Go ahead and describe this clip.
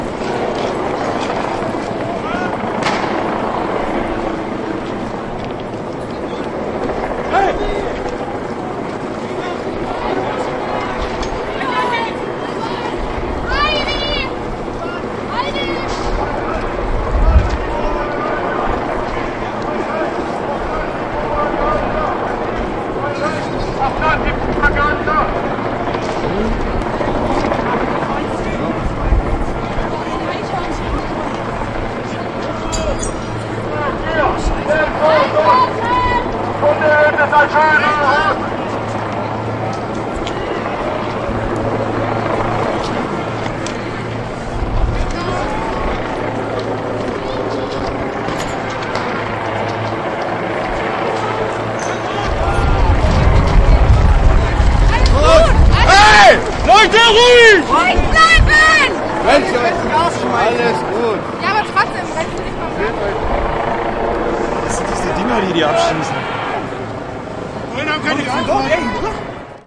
Riots [Leipzig, Germany, 12.12.15]
A recording of the riots in Leipzig, Germany at the 12th of December, 2015.
After a more or less peaceful protest against right-wing parties, neo-Nazis and other right-wing extremist, the mood shifted. The policemen and the black bloc acted aggresive against each other. Armored water canon trucks,teargas grenates, stones ...
I also had to run often, stood in the middle with my microphone.
I just cuttet out some hard knocks on the mic... nothing more.
nazi, nazis, shouting, people, Riots, crowd, demonstration, political, conflict, Leipzig, protest, refugees, field-recording, riot